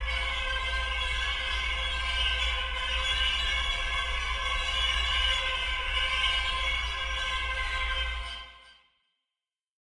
cello cluster 1
Violoncello SFX Recorded